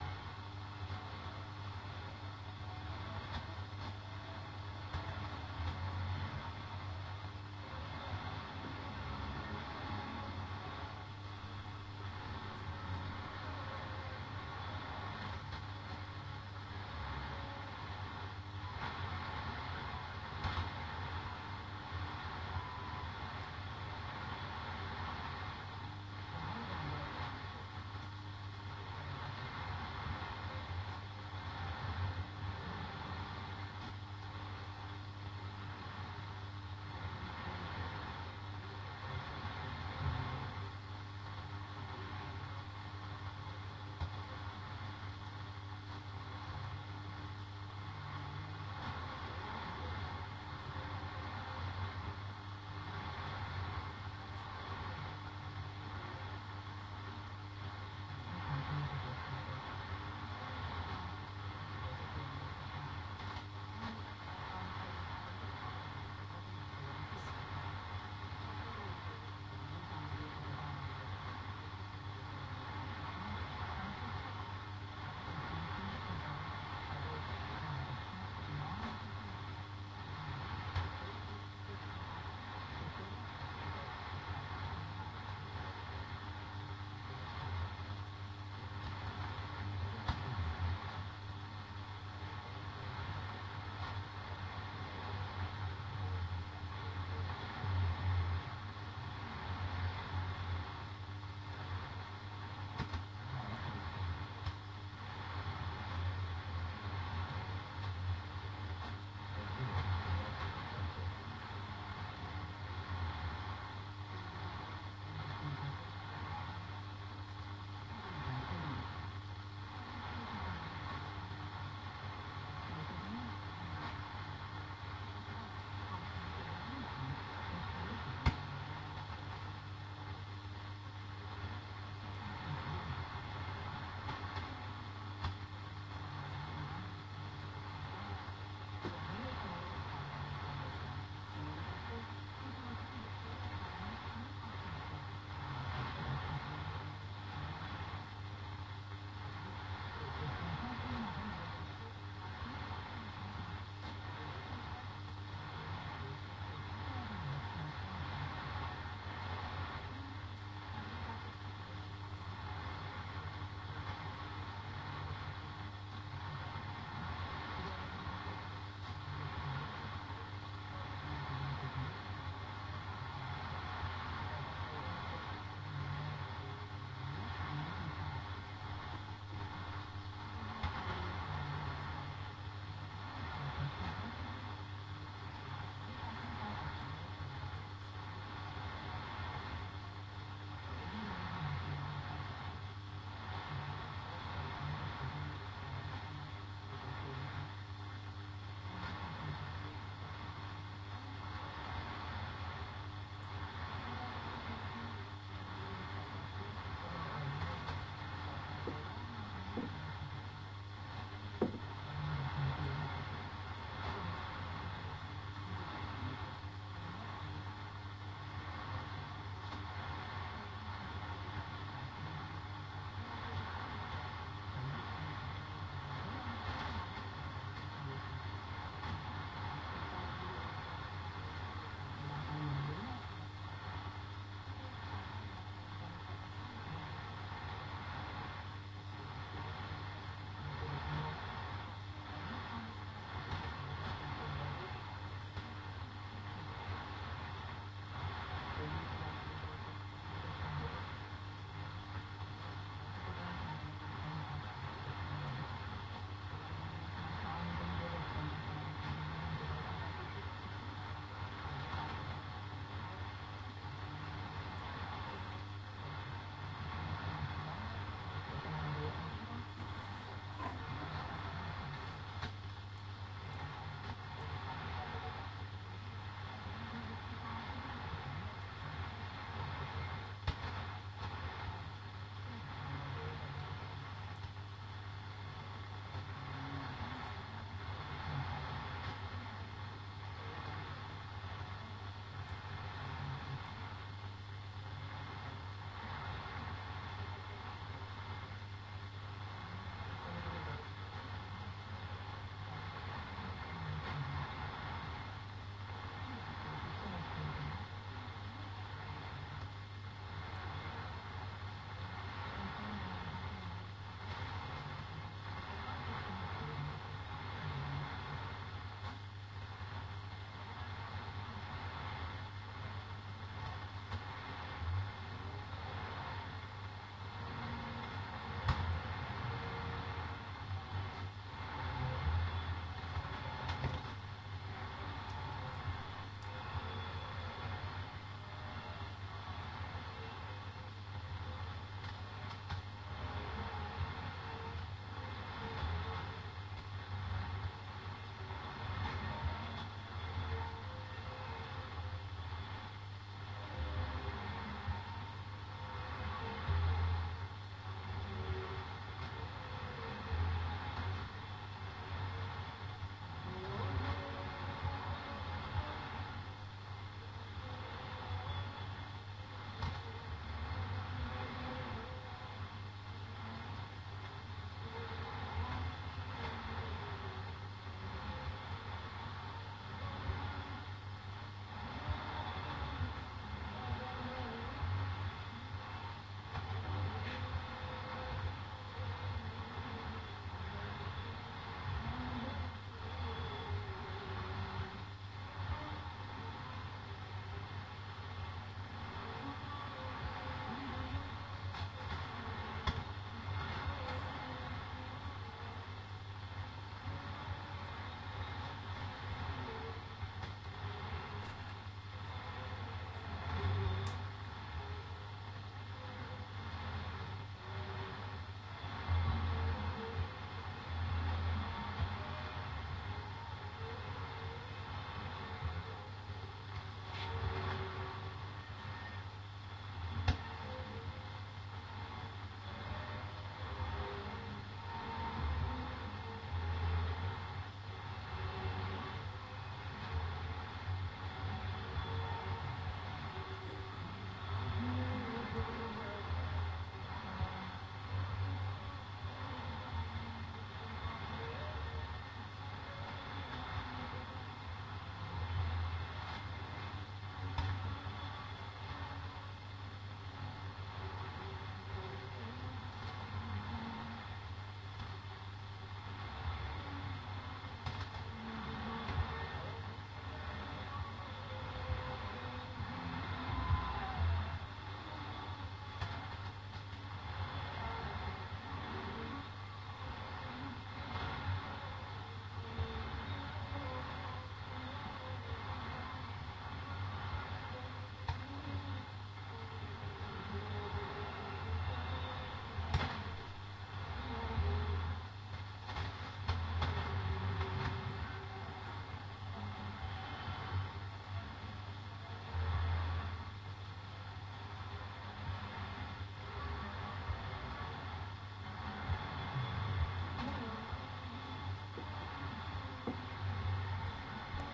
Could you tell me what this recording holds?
tube radio shortwave longwave noise interference hiss faint broadcast 2
Another faint broadcast signal with interference and some hiss, captured on an old tube radio with a long antenna.